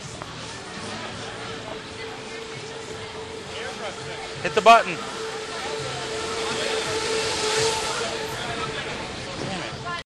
Tram car disappoints in regards to the message I wanted to capture but gives a nice pass by stereo perspective on the boardwalk in Wildwood, NJ recorded with DS-40 and edited in Wavosaur.